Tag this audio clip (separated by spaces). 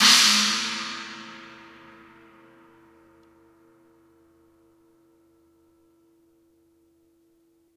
Sound Rubber Gong Drumstick Cymbal Ring Chinese asia Mallet